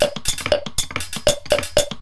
A drum loop I created for a reactable concert in Brussels using kitchen sounds. Recorded with a cheap microphone.
They are dry and unprocessed, to make them sound good you
need a reactable :), or some additional processing.
beat, drum, dry, kitchen, loop, reactable, rhythm, unprocessed